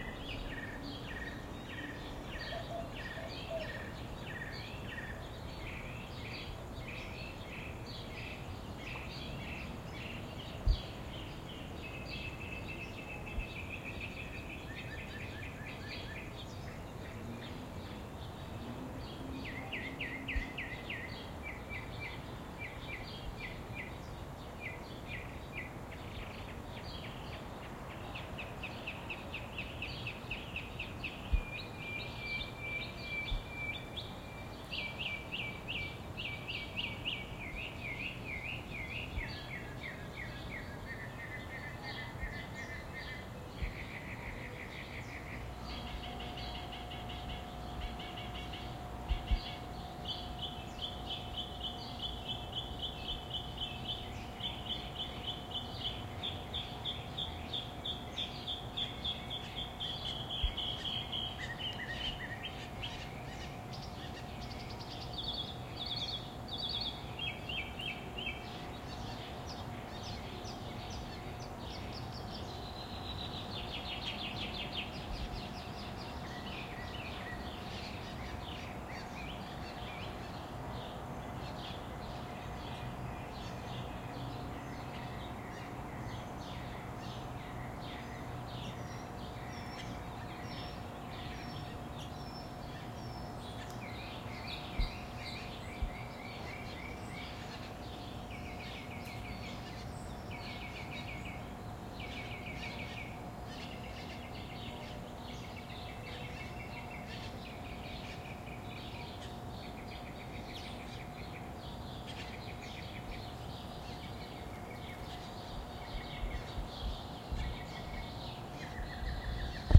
Summer Dawn Birds, Phoenix Arizona
Recorded at dawn in the summer in a large open courtyard of an apartment complex in central Phoenix Arizona. Variety of birds, faint city ambience, perhaps distant air conditioners.
Recorder: Zoom H1
Processing: none